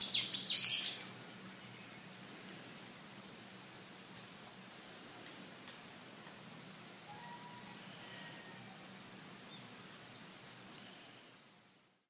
recorded on IPhone 4S on Tenayama Hiking Trail in Kagoshima City, Japan
cicada, birds, background, nature